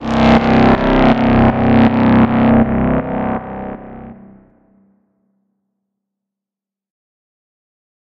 reverb bass 2
a dark and distorted reverb bass hit
bass, bassline, dark, distorted, echo, reverb, wave